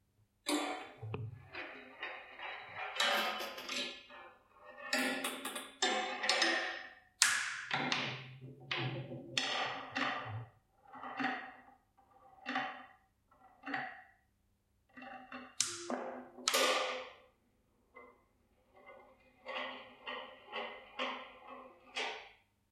Kinetic Structure 2
Sounds of balls on a kinetic structure created By Mr "Legros" and his son
Recorded with a Zoom H2N on XY directivity
impact, psychedelic, metal, enormous, technica, structure, bizarre, gravity, design, kinetic, pro